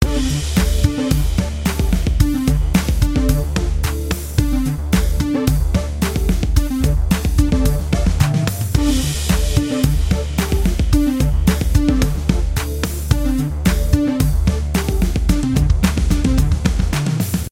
Custom Groovy Beat (Made in GarageBand)
Just a random groovy short song I made, maybe good for intros or game menus..
Music, Short